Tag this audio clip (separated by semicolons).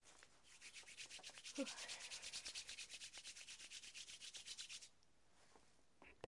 rub; shiver; quieter; together; hands; cold